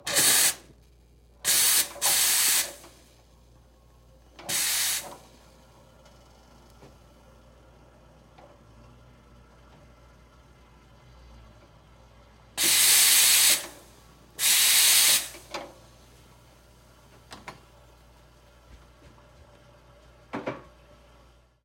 Cappuccino coffee steamer dry blast clearing nozzle - 01
various steam blast cleaning out moister from steam wand